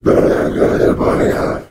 A sinister low pitched voice sound effect useful for large creatures, such as demons, to make your game a more immersive experience. The sound is great for making an otherworldly evil feeling, while a character is casting a spell, or explaning stuff.
arcade, brute, deep, Demon, Devil, fantasy, game, gamedev, gamedeveloping, games, gaming, indiedev, indiegamedev, low-pitch, male, monster, RPG, sfx, Talk, troll, videogames, vocal, voice